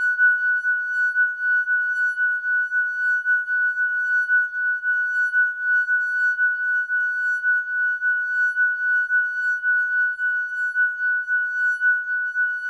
Wine glass, tuned with water, rubbed with wet finger in a circular motion to produce sustained tone. Recorded with Olympus LS-10 (no zoom) in a small reverberating bathroom, edited in Audacity to make a seamless loop. The whole pack intended to be used as a virtual instrument.
Note F#6 (Root note C5, 440Hz).